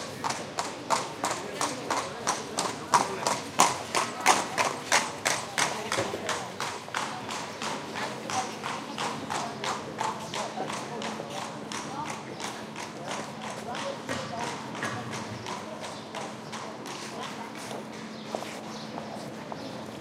Horse cart passing by, voices and streetnoise in background. Shure WL183 mics, Fel preamp, Edirol R09 recorder.
carriage, hoofs, horse, field-recording